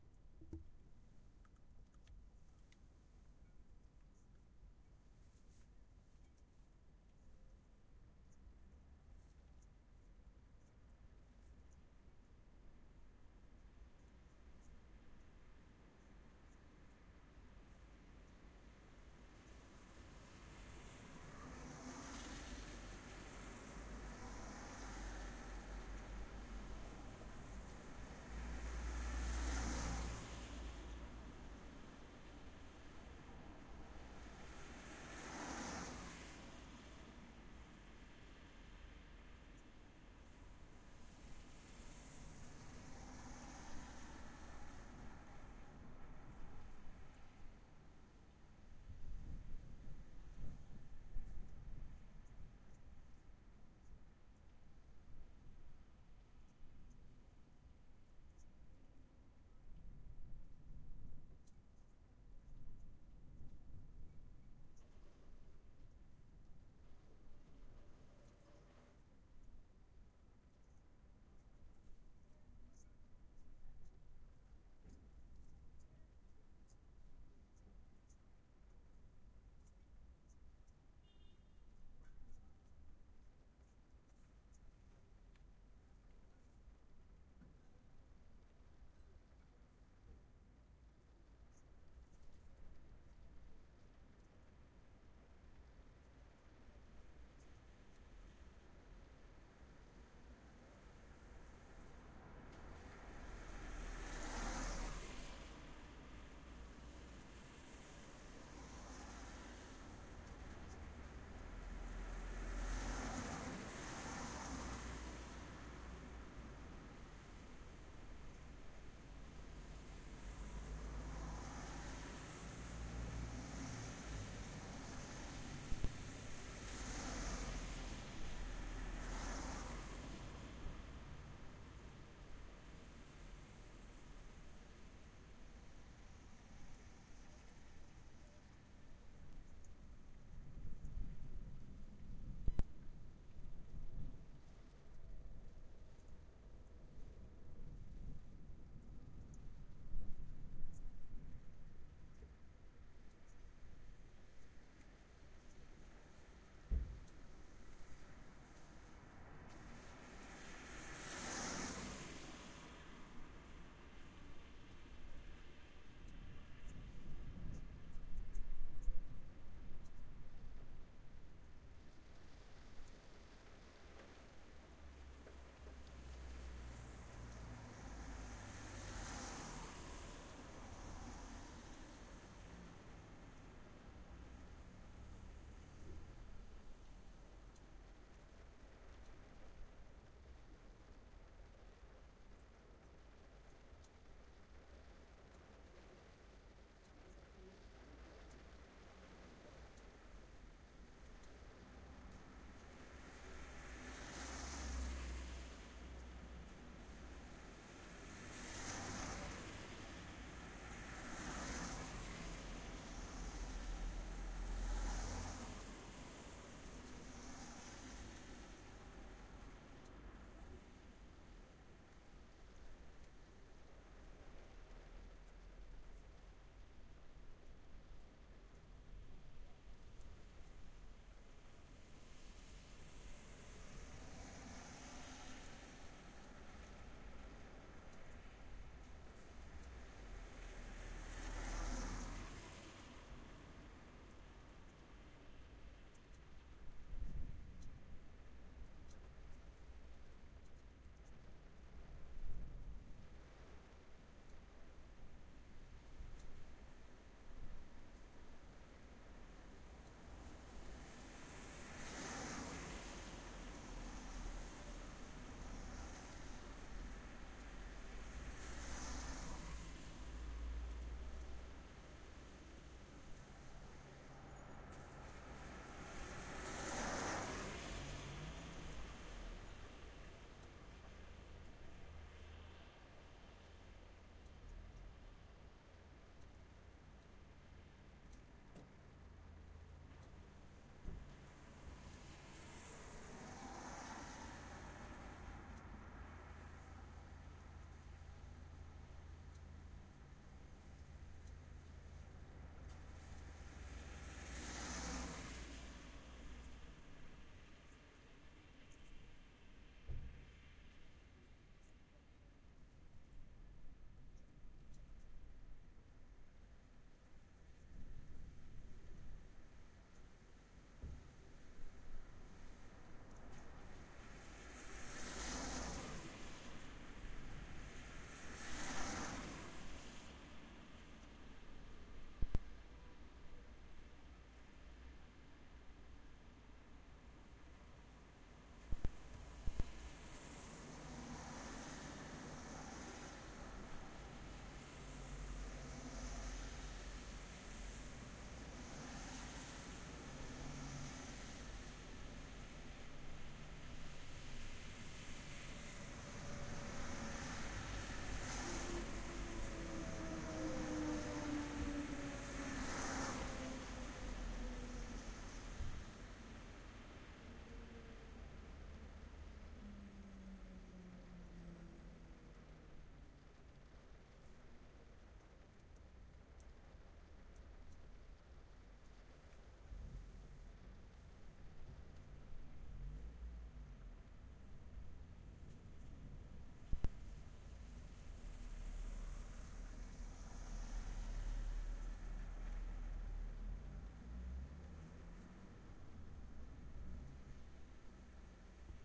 Inside parked car, traffic & rain
I recorded this inside my car on Victoria Road, Glasgow while waiting to pick up someone up. It was windy and rainy and there were people and traffic passing by. Mic was positioned in my phone holder on my dashboard
Recorded on an iPhone 4S with a Tascam iM2 Mic using Audioshare App
Car, Glasgow, iP, iPhone-4s, Parked, Rain, Tascam-iM2, Traffic, Wind